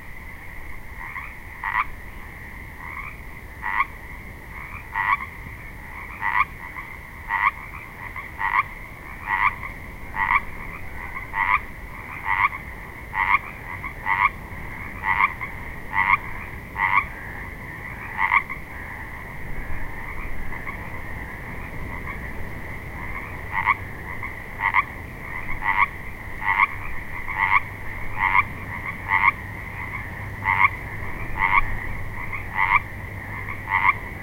Pacific tree frogs (Pseudacris regilla) croaking in a disused quarry. This clip has one frog close, others in middle distance. Quite a bit of wind noise from nearby trees.
spring, nature, frogs, pond, croak, swamp